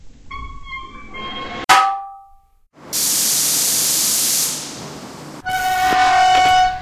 Rusty Valve Turn (Open)
A rusty space hatch that opens. There were four sounds used to make this. Thanks for you sounds guys! Good night!
squeak, valve, door, hatch, rusty, space, sci-fi